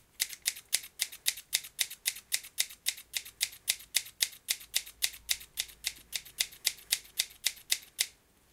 CLICK RHYTHM 09
A set of rhythms created using kitchen implements. They are all unprocessed, and some are more regular than other. I made these as the raw material for a video soundtrack and thought other people might find them useful too.
improvised, beats, rhythmic, clicks